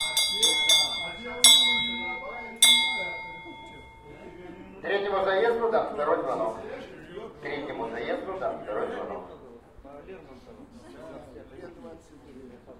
Second bell to race #3
Recorded 2012-09-29 12:30 pm.